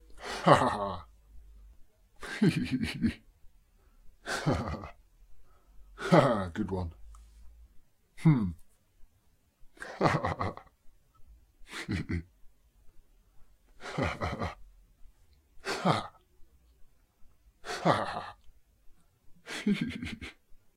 Voice Request #2b - Laughing (Low)
laughing, low, male, request